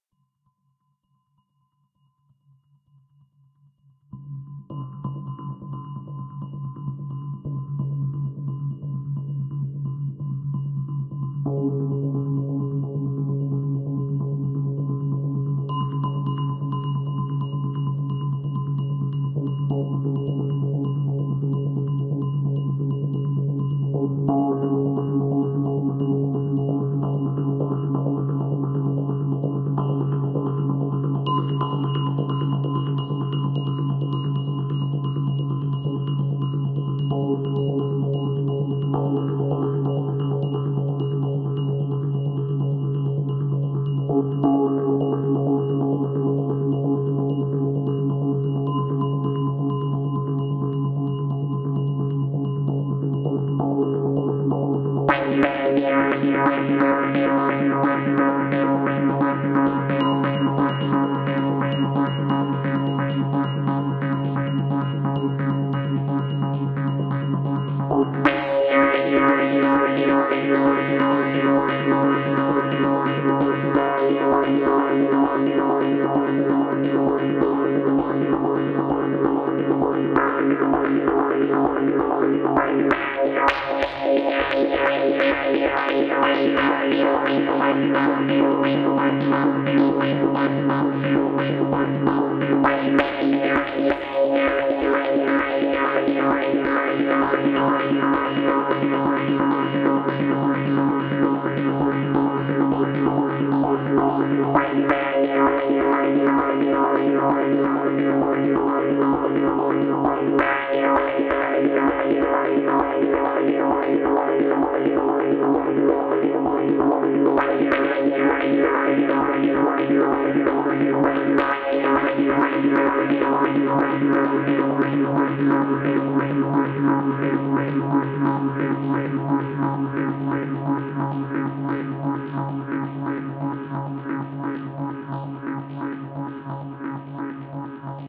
static ambient
Just one note of a synthetic piano morhped in ableton